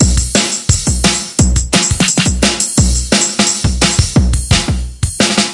made with a Roland MC-303